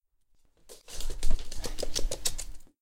dog running on wood floor 1
Dog running on a wooden floor